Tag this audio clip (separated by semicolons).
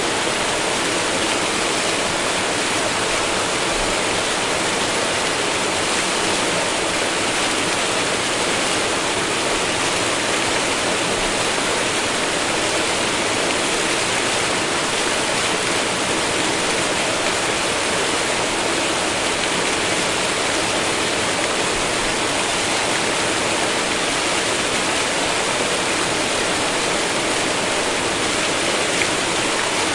babbling; flow; flowing; gurgle; lapping; liquid; loop; mill; river; stream; trickle; water